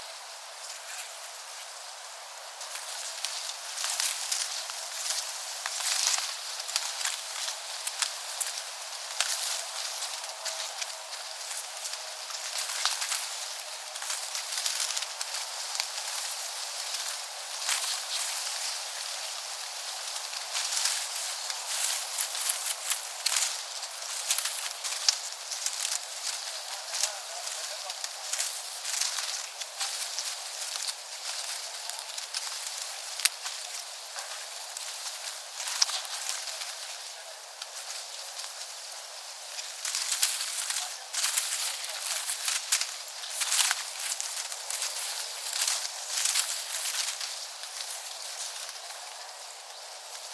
outdoors ambient windy wind leaves rustle 2
ambient, leaves, outdoors, rustle, wind, windy